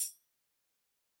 (c) Anssi Tenhunen 2012